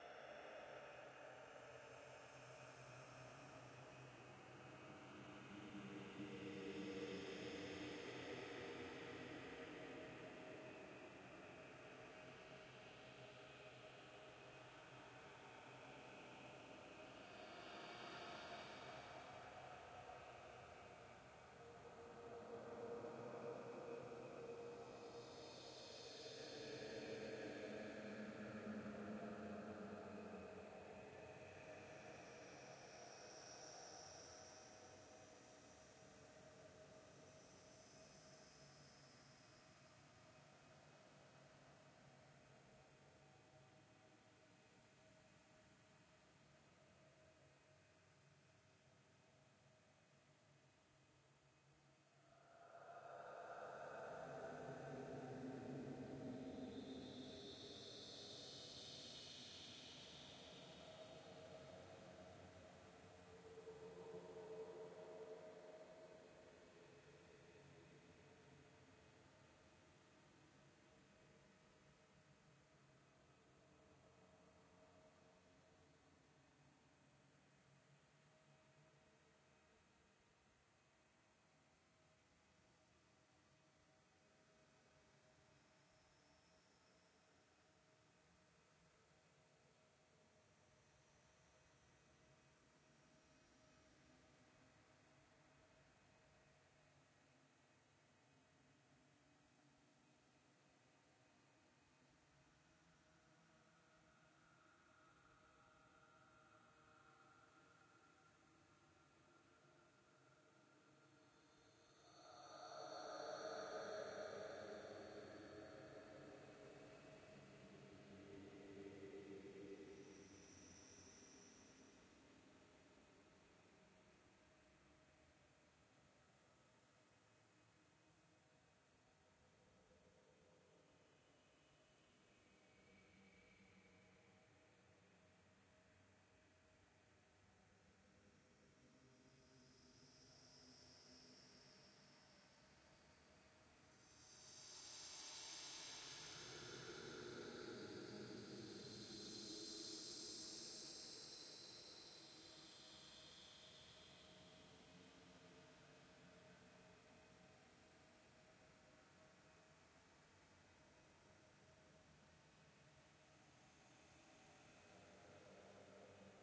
slow thunder sheet
creepy, aliens, slow suspense. Made with a thunder sheet in slow motion.
terror, haunted, ghost, sinister